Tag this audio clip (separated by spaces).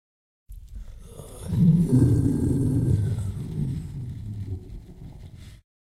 lion tiger roaring growl animal growling wild snarl